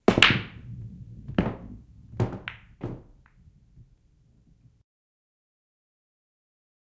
jf Pool Ball hit and pocket
Pool ball hit and pocket.